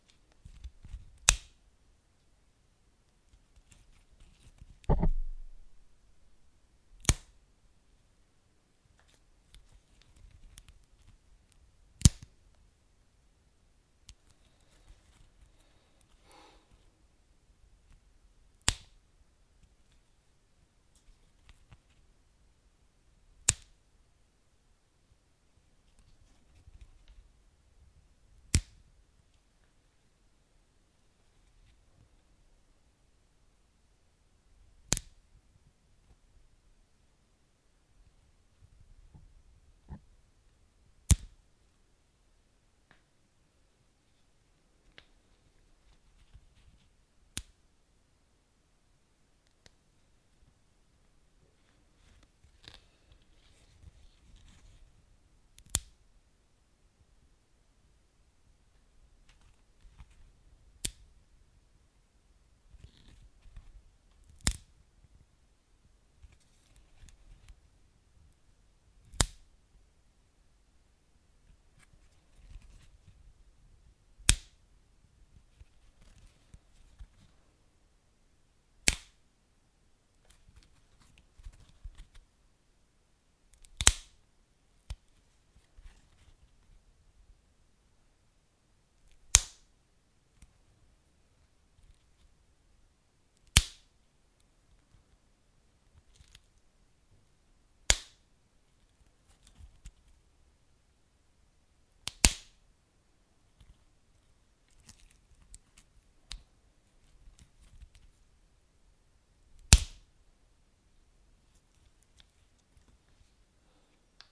Cracking Sticks Two
Sound of popping or crackling wood, log, or kindling burning in a fire. Recorded on a Rode mic and Zoom H4N Pro.
crackle, burn, fire